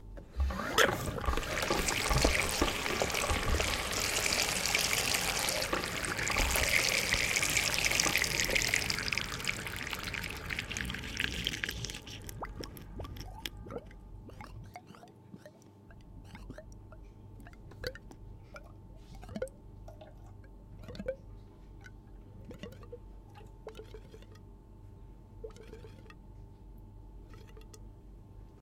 Pouring water into electric teapot